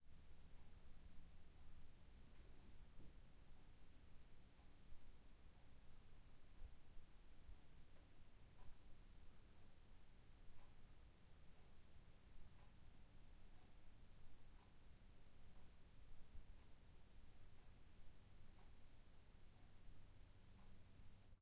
Living room tone with clock ticking
Room tone captured from a living room. Light ticking from a clock.
living, room, tone